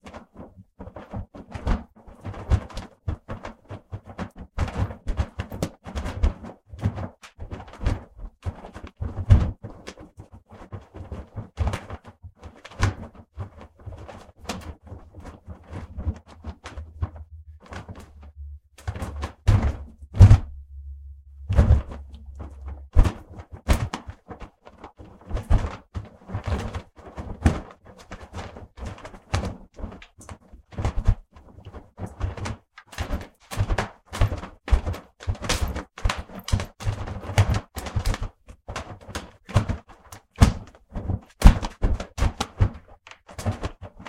RAH Flapping Fabric 2
Recording #2 of my own shirt flapped, snapped, whipped, waved etc. If you pitch-shift this down (or slow it down) it can sound (IMO) indistinguishable from, say, a large flag or large sail being pulled and snapped in the wind.
This had recording noise removed, and silences auto-trimmed, with auto-regions from that trimming generated and saved in the file (handy for selecting a sound region easily or exporting regions as a lot of separate sounds).